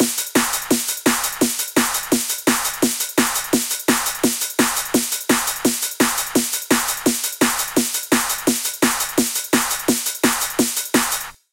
Rhythm 4b 170BPM
Without kick drum. Hardcore 4 x 4 rhythm for use in most bouncy hardcore dance music styles such as UK Hardcore and Happy Hardcore
rave, 170bpm, hardcore, rhythm